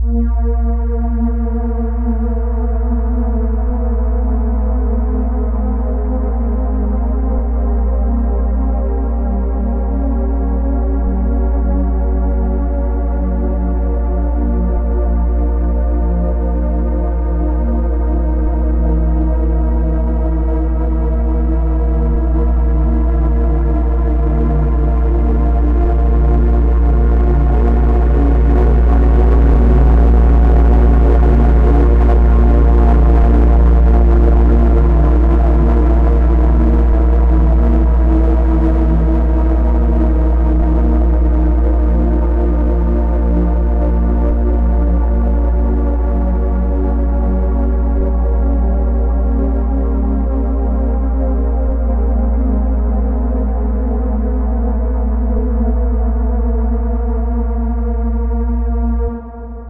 A distorted eerie drone loop. Made by playing around with lots of things in FL Studio